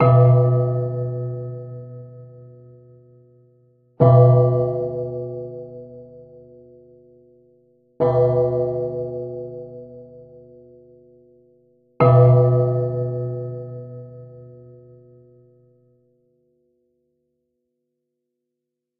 Clock Chime tubebells handbells vibes
While creating an ambient haunted house track, I created 4 slightly different versions of a grandfather clock chime by layering tube bells, hand bells, and a vibraphone at varying dynamics and articulations in Musescore 2.0. The chime rings at a B2. I obviously had a clock in mind when creating these sounds, but you could also use this as a singing bowl, a bell or gong, or as any number of sound effects.
B2, Dark, Chime, SFX, Clock, Ambient, Eerie, Atmosphere